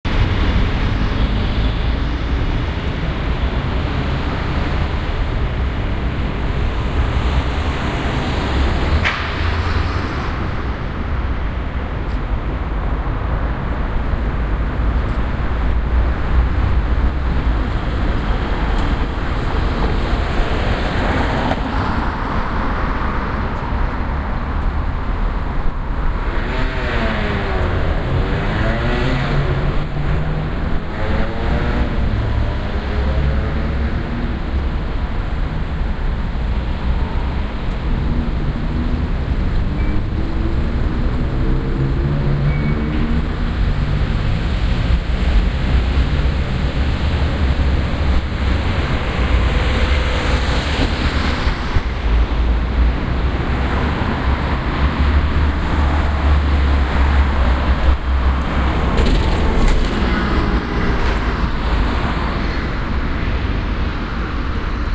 Holborn - Holborn Circus ambience
ambiance, ambience, ambient, atmosphere, background-sound, city, field-recording, general-noise, london, soundscape